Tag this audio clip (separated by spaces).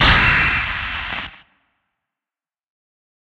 industrial drum-hit processed beat short